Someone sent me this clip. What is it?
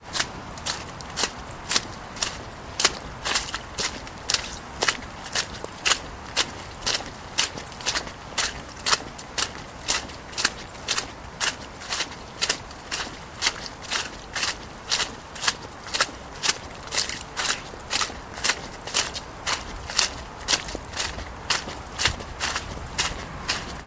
Footsteps, Muddy, D
Raw audio of footsteps in some squelchy mud.
An example of how you might credit is by putting this in the description/credits:
foot, footstep, footsteps, mud, muddy, squelch, squidgy, step, steps, watery